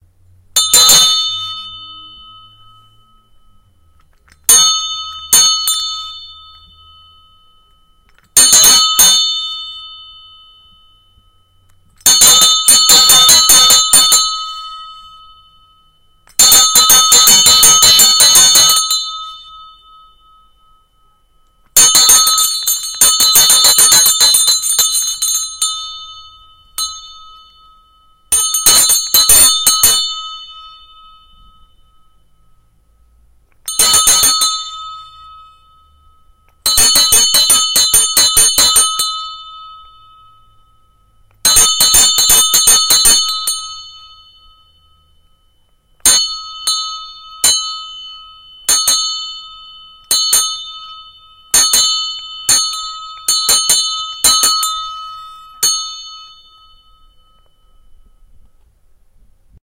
Hand Clock
Ringing of a clock
Clock, Ring, Ringing-clock